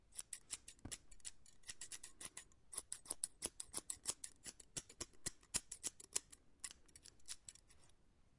scissors open close1
open, scissors